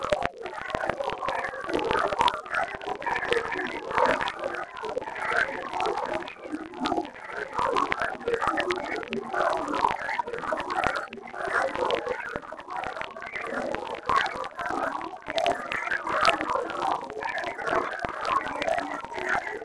Ghosts auditioning for American Dead Idol.
ghost, grains, granular, synth, voice